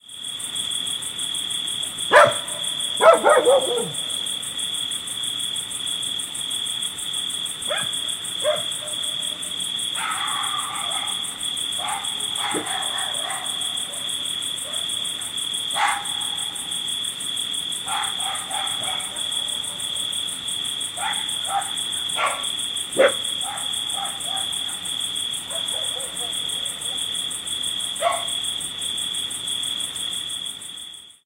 20160719 barking.night.56
Medium-sized dogs barking from various distances and angles. Crickets in background. Recorded near Madrigal de la Vera (Cáceres Province, Spain) using Audiotechnica BP4025 > Shure FP24 preamp > Tascam DR-60D MkII recorder.
barking, country, dogs, farm, field-recording, nature, night, summer